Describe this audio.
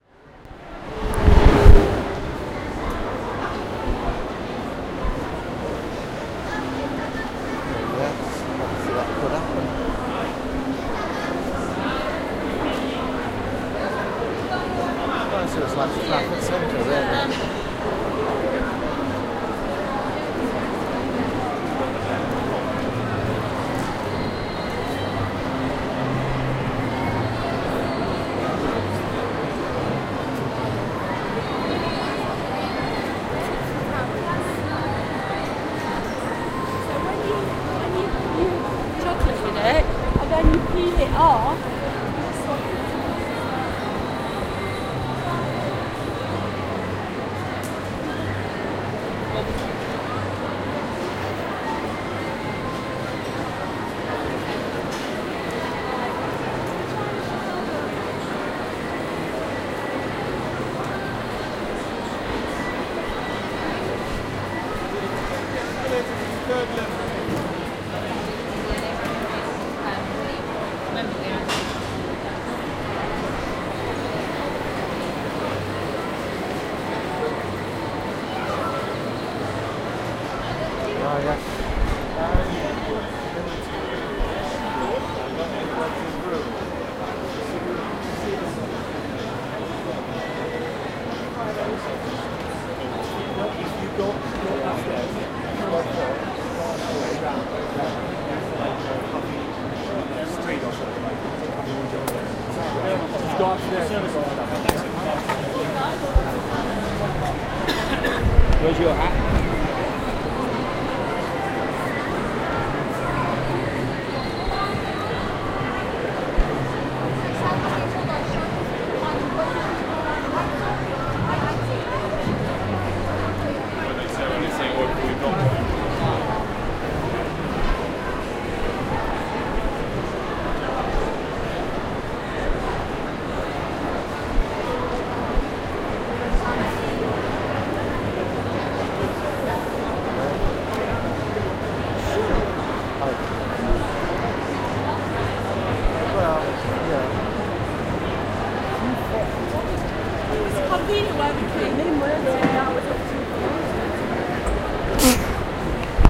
ambience - people busy shopping mall
Ambience inside a busy shopping mall.
Town, Shopping, Mall, Ambience